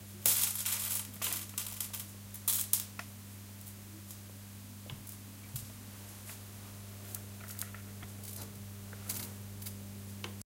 Kochendes Wasser auf Herd
this crackling noise came when some hot water got out of the pot while i was cooking water for my noodles :) sounds a little bit like electricity though